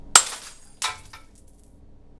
breaking glass 1
breaking, glass